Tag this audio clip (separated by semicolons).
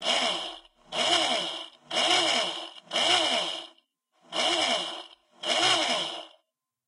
hand-drill; machine; mechanical; sound-effects; tools